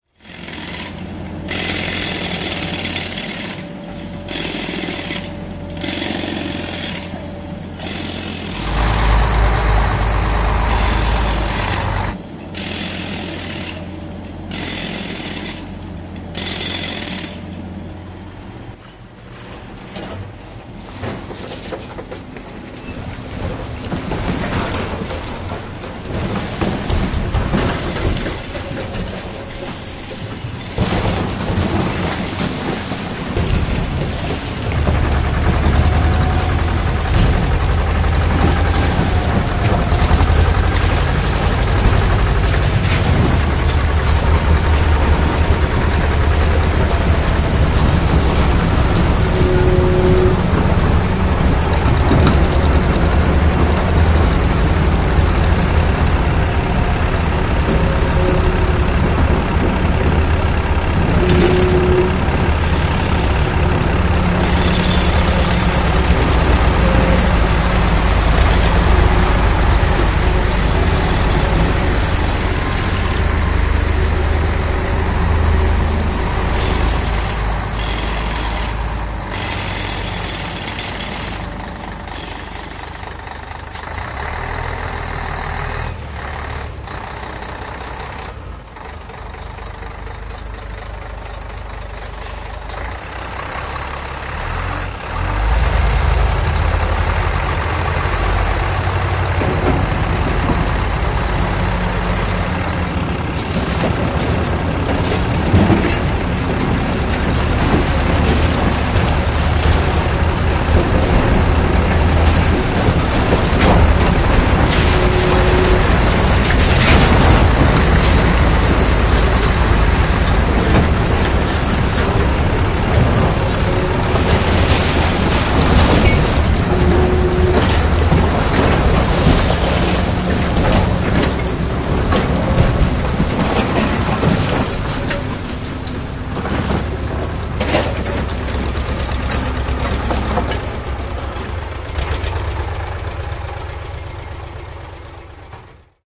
construction Site
building, demolition, destruction, jackhammer, machine, Trucks